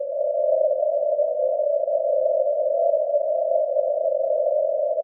Some multisamples created with coagula, if known, frequency indicated by file name.